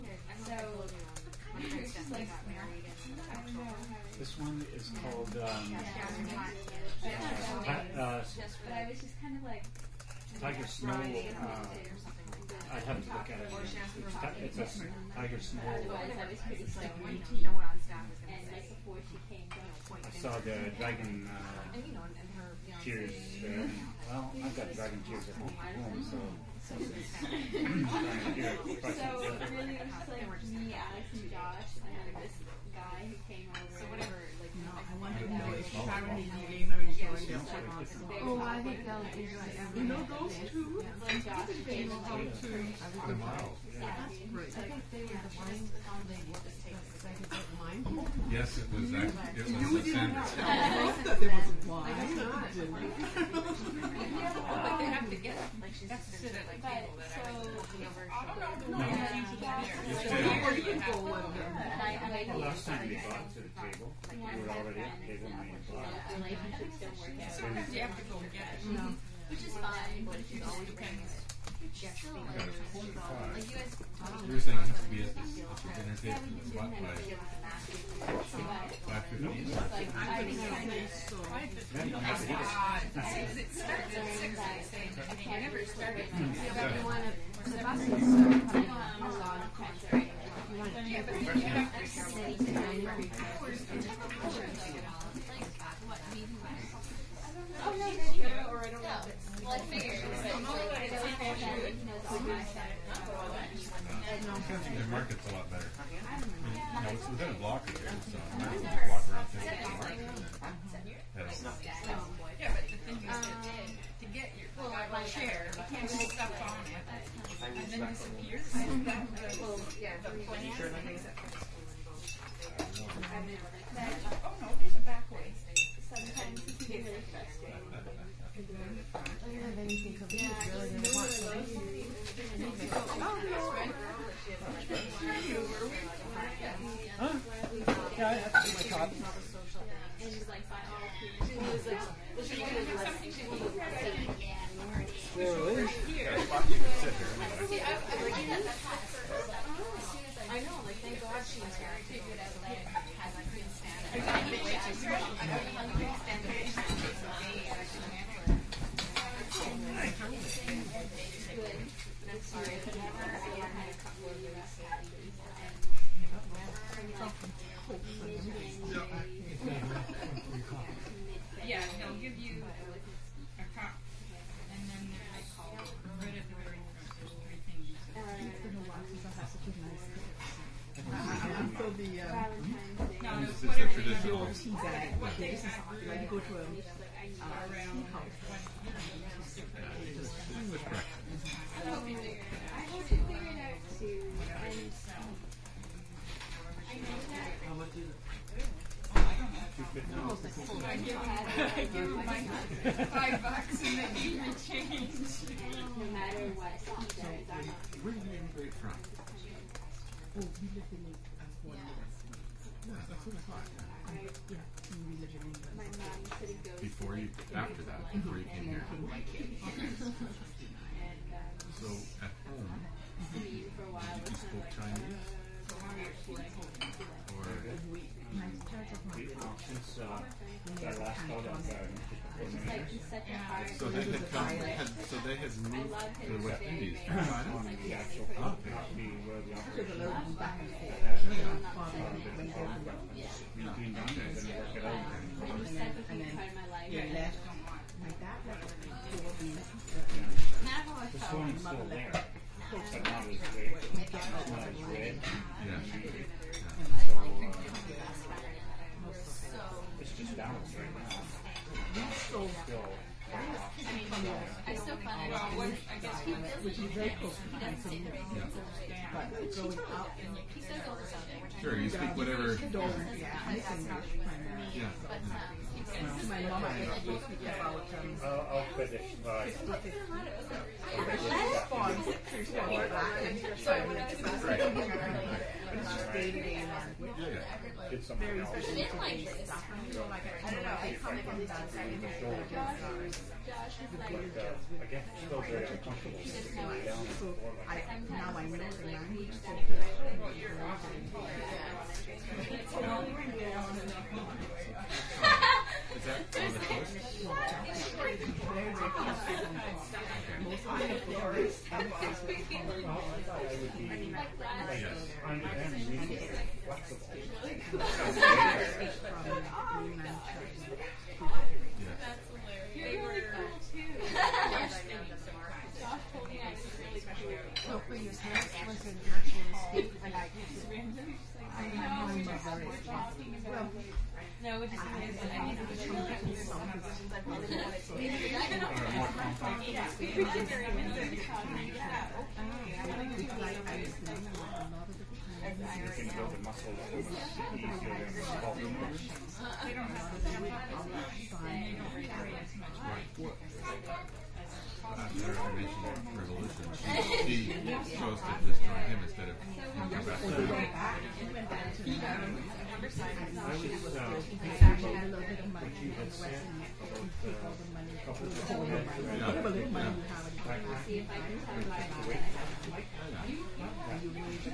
Binaural stereo recording of a small coffeeshop. People chatting. Laptop typing.
ambiance, ambience, atmosphere, coffee, coffeeshop, conversation, crowd, eating, field-recording, murmer, shop, walla